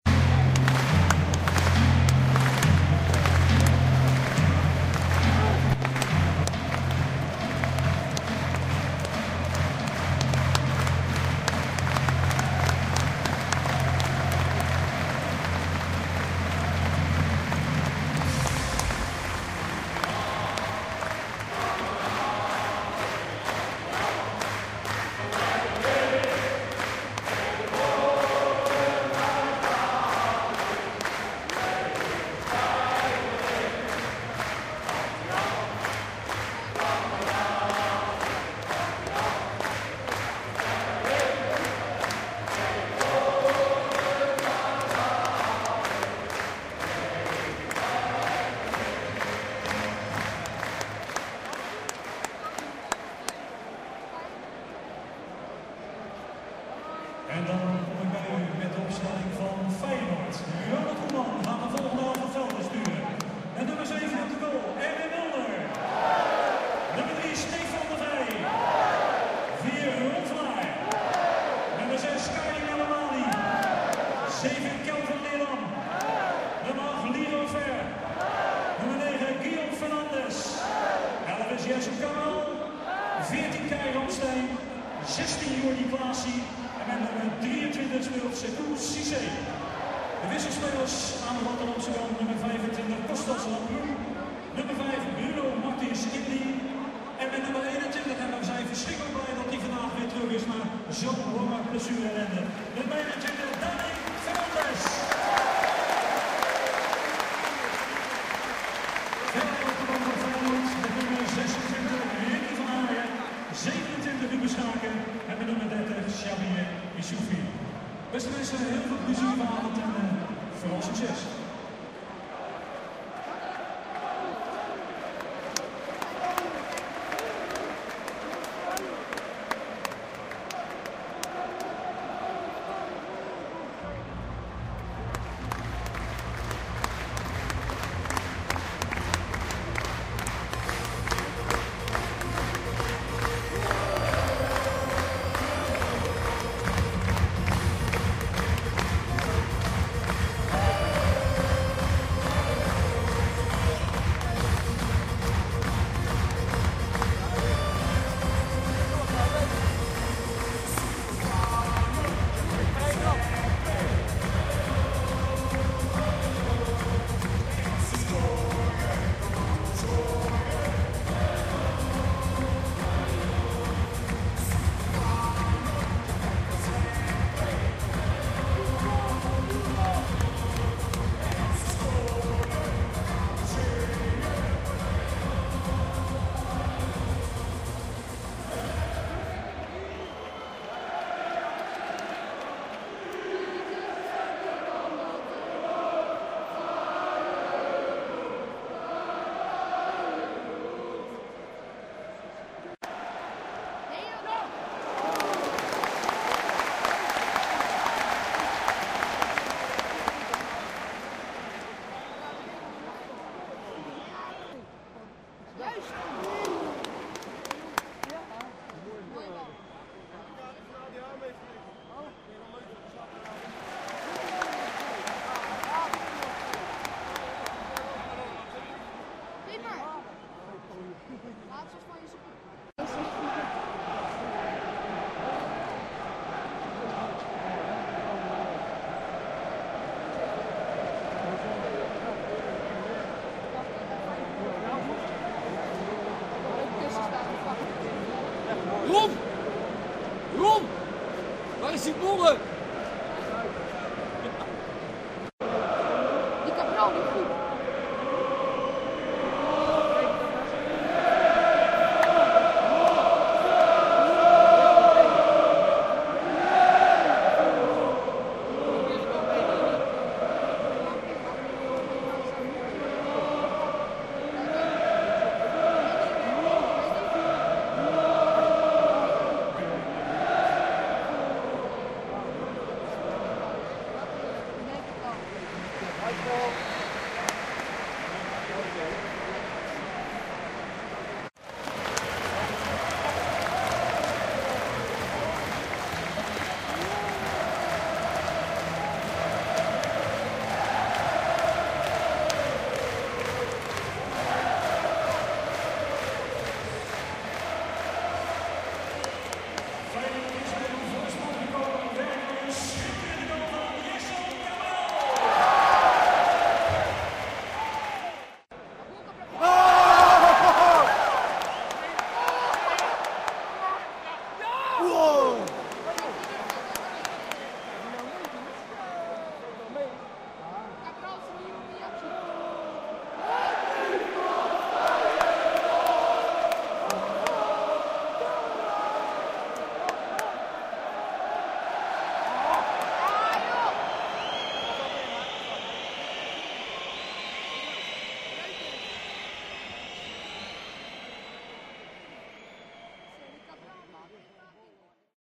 First test with Zoom H2 in Feyenoord stadium. Compilation of sounds from first part of the match. Players entering the pitch, a goal and "panna" by Jerson Cabral.
atmosphere, holland, stadium